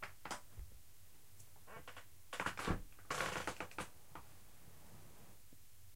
its just a recording of myself siting on a plastic chair